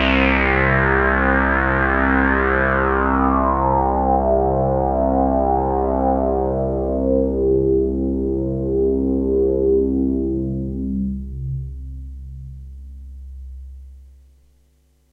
downward synth sweep sound created on my Roland Juno-106